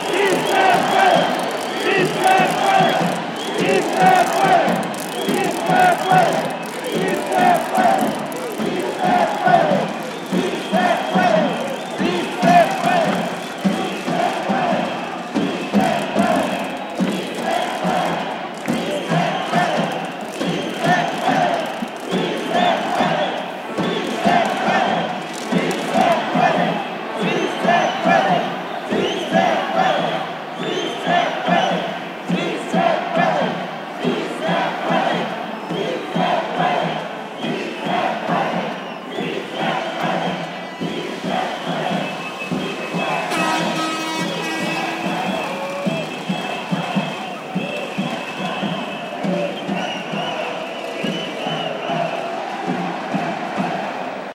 Crowd shouting "sí se puede" in downtown streets, huge reverb off skyscrapers. May Day immigrants rights demonstration in Chicago. Recorded with a mini-DV camcorder with an external Sennheiser MKE 300 directional electret condenser mic. Minimal processing: zero-aligned, normalized to -3.0 dB.
city, crowd, field-recording, human, political, voice